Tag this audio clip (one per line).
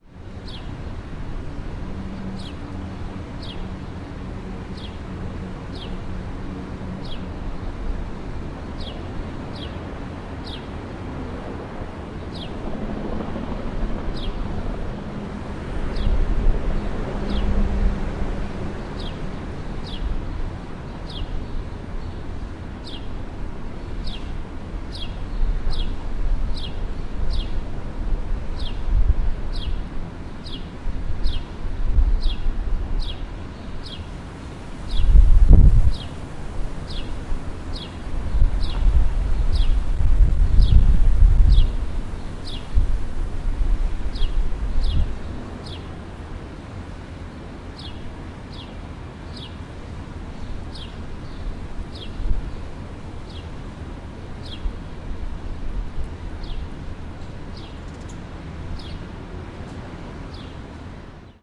Elaine
Field-Recording
Koontz
Park
Point
University